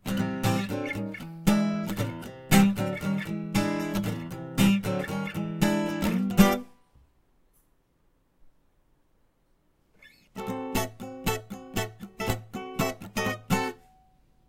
acoustic guitar
guitar, c, chords, acoustic, major, chord, clean